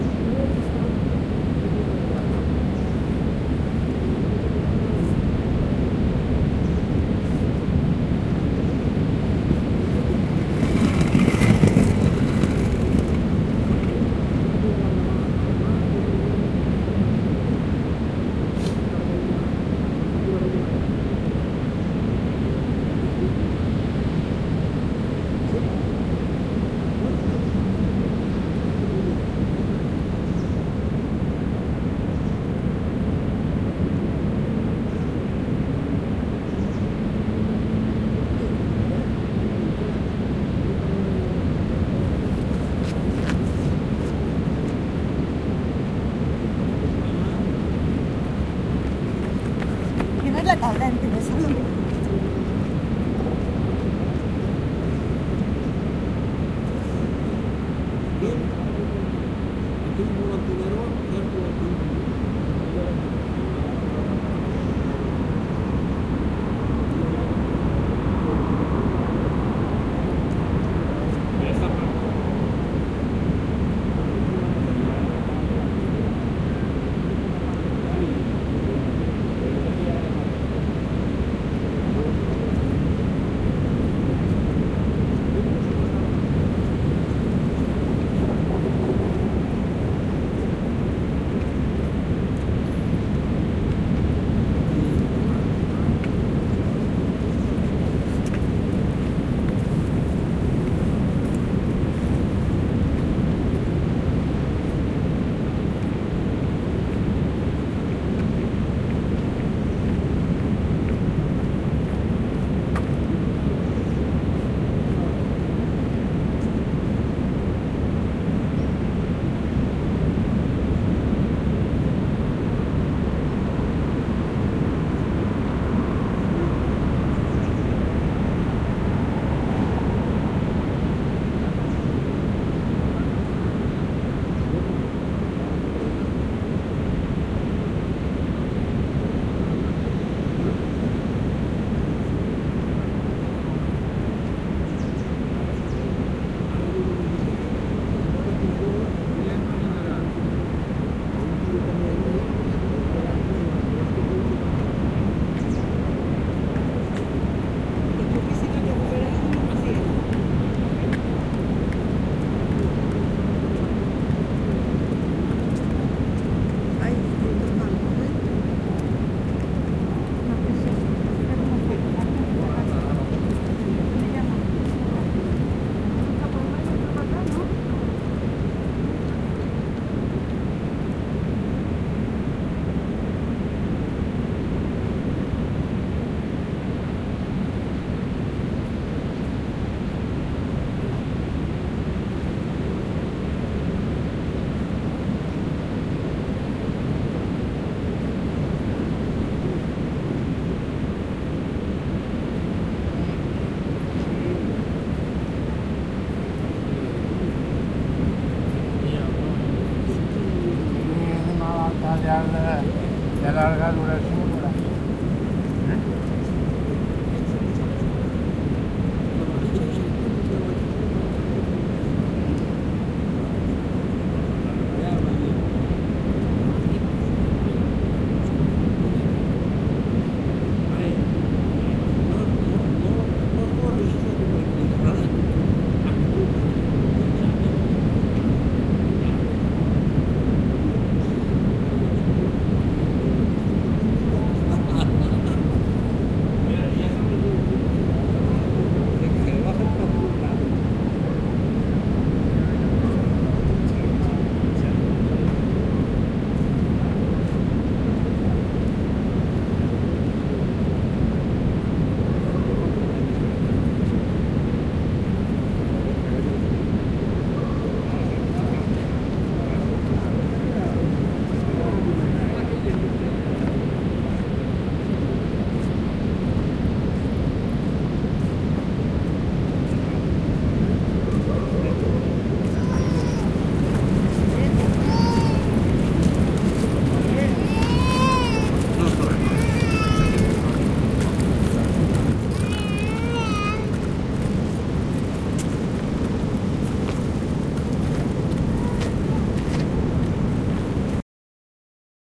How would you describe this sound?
Sounds of walk beach of Gandía in an afternoon of a day of November with calm weather. You can hear people walking, doing sport, talking... with a ambient sound of sea.